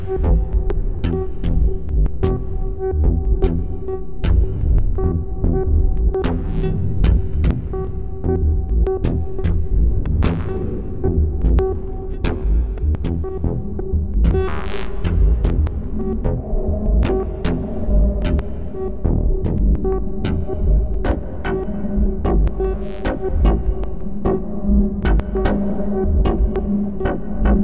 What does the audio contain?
Cloudlab-200t-V1.2 for Reaktor-6 is a software emulation of the Buchla-200-and-200e-modular-system.
2, That, Native, Runs, Emulation, 200t, Software